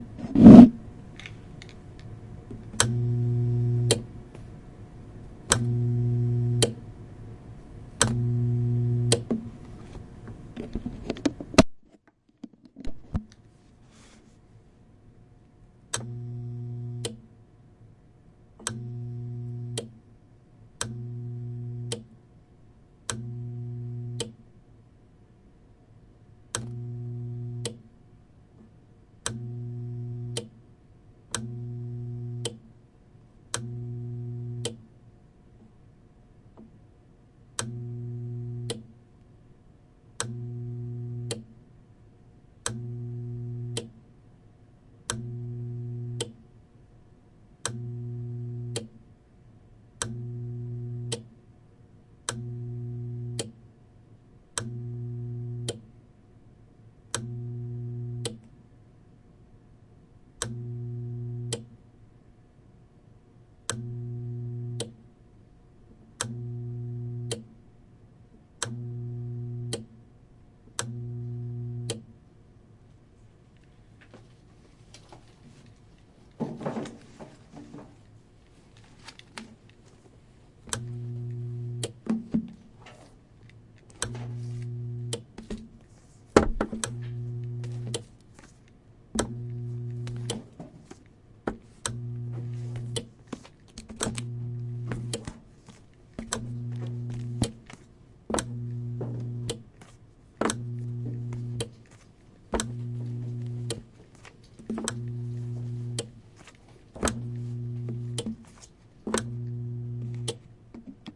Recording of book sensitizer. Recorded on Zoom H2.